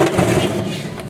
Metal Grind
A small section of audio recorded as part of a short film. It is actually a bunch of books being dragged out of a locker. the mic was situated inside the locker behind the books.
Grind, books, metallic, crush, cut, grunge, locker, slide, crunchy, squeak, drag